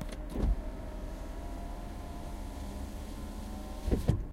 car window close
Honda CRV, power window being rolled up from interior. Recorded with a Zoom H2n.
window, car